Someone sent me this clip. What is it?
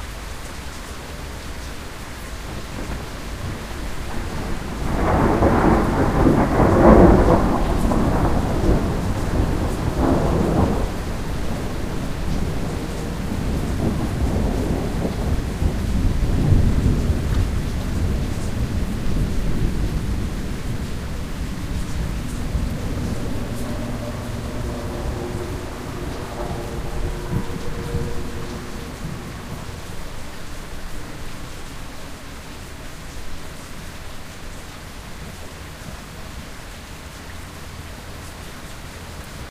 thunder 2 + plane
A sustained, distant, fairly striking thunderclap in the middle of a heavy rainstorm, followed by the sound of a plane in the distance, recorded from the second floor window of a town house about half-a-mile from a small airport.
aeroplane, distance, rain, storm, thunder